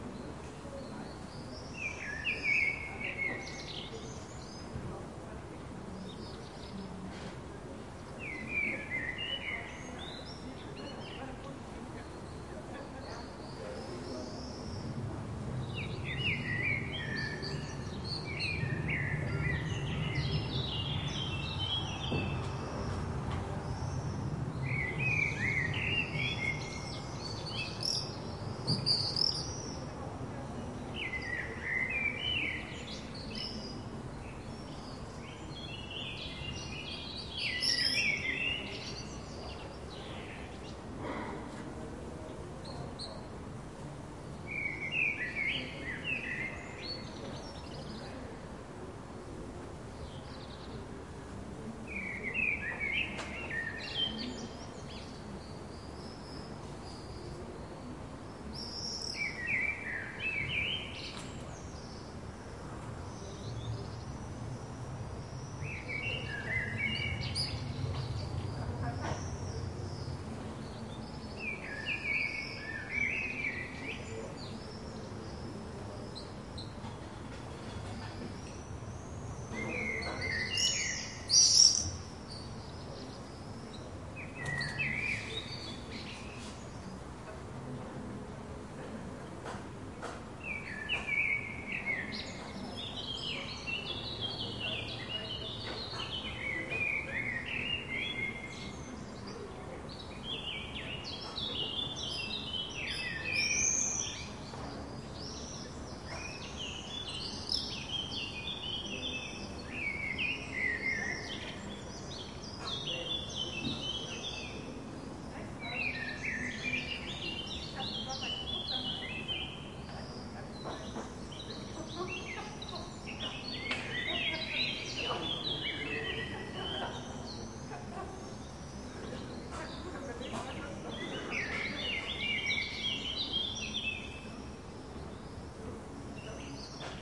140614 LpzBackydWaldstr Summer F
Field recording taken from the balcony of an apartment building in the Waldstrasse district of the German city of Leipzig on a summer evening.
In the foreground, quiet noises from neighboring apartments can be heard as well as the calls of countless swallows who nest in the eves of the 19th century houses which this part of town is famed for. In the background, distant city traffic may be heard.
These are the FRONT channels of a 4ch surround recording, conducted with a Zoom H2, mic's set to 90° dispersion.
summer, ambiance, peaceful, soundscape, urban, field-recording, Germany, Europe, ambient, surround, backdrop, city, neighborhood, atmo, atmos